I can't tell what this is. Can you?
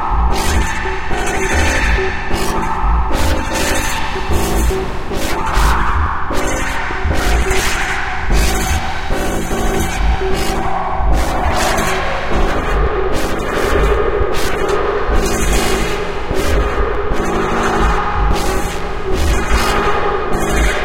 Cloudlab 200t V1.2 (Buchla Software Emulation) » 0009 Mix-13
Cloudlab-200t-V1.2 for Reaktor-6 is a software emulation of the Buchla-200-and-200e-modular-system.